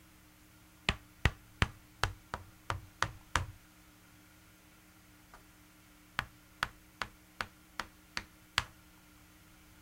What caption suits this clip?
FX Board Game Pawn01
board, game, pawn, piece